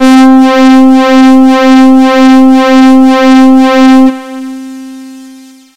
33 strings tone sampled from casio magical light synthesizer